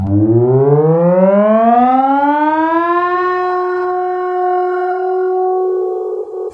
Mangled snippet from my "ME 1974" sound. Processed with cool edit 96. Step four, converted to stereo.